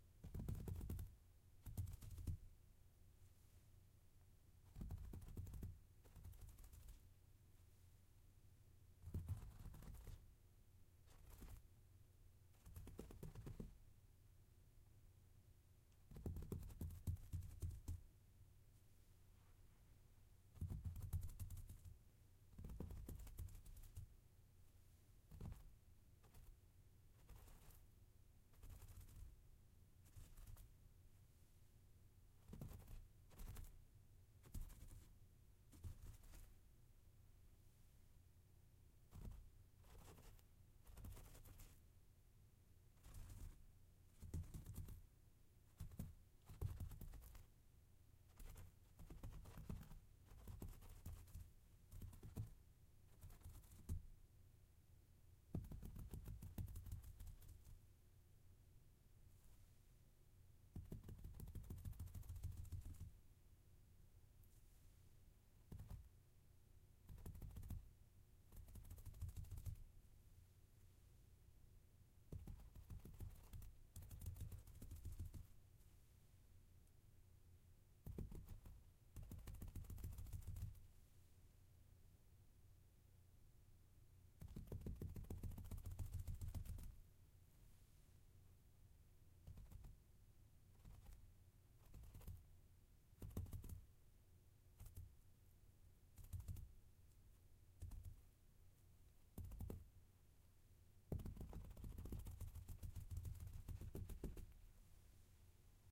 Small Creature Scamper on Carpet
Small creature scamper/skitter sounds. Performed using my fingertips on carpet.